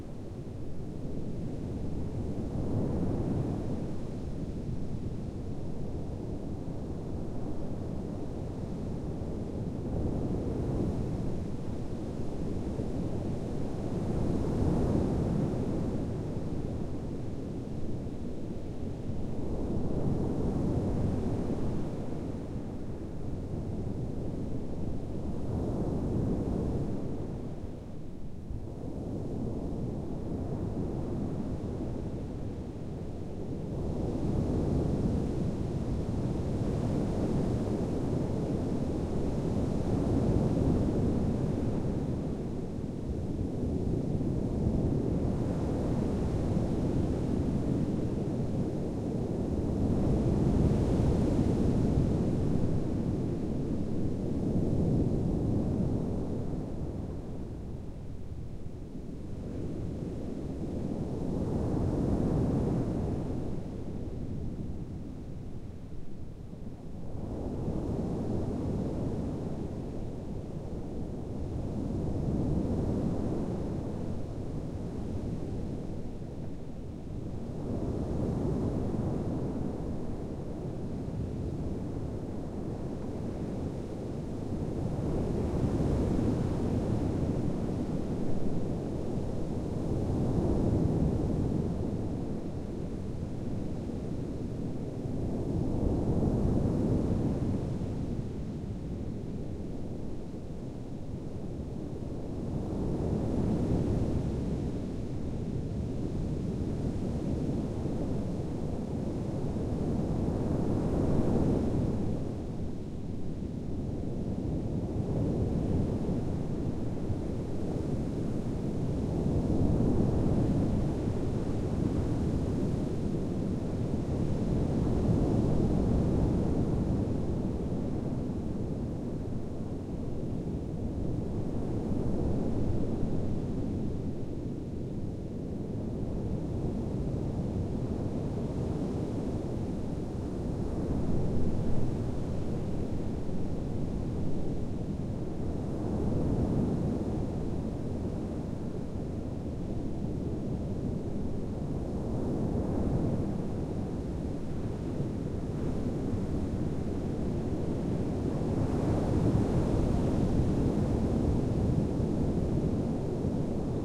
Waves, big. 30m distance off axes
Big waves at 30m distance (microphone off axes)
Beach, Greece, Sea, Waves